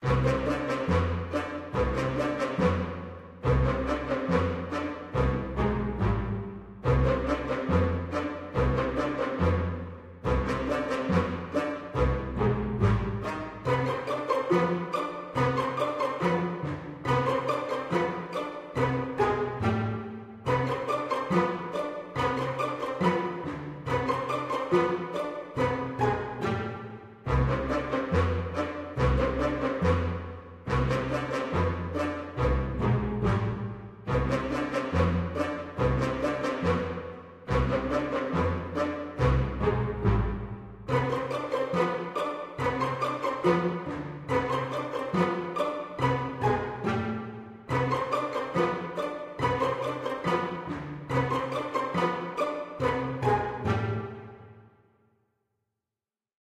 Funny Background Music Orchestra (Loop)
Title: My Cat
Genre: Funny, Orchestra
One of my old composition
film, music, string, background, brass, funny, orchestra, movie, violin, video, game